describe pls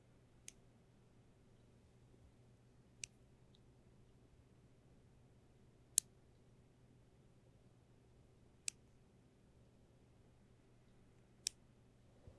Clothespin opening and closing.
close, clothespin, open, c47